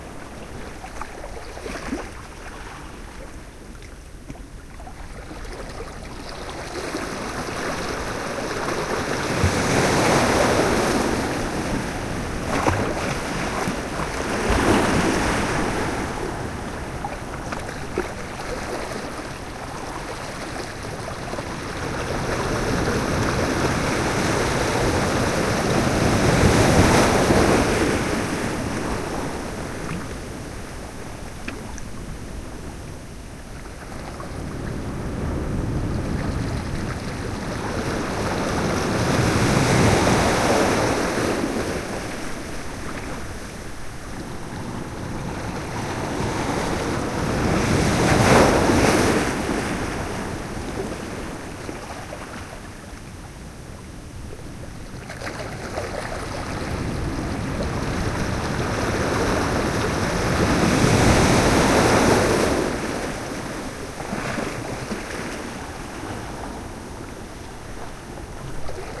Ocean waves at Point Reyes. Edited as a loop. Using a Sony MZ-RH1 Minidisc recorder with unmodified Panasonic WM-61 electret condenser microphone capsules. The left and right omnidirectional capsules are separately mounted in lavalier housings that allow independent placement when recording.
field-recording, splash, beach, seashore, Point-Reyes, stereo, close, waves, ocean, wet, slosh, loop, water, sea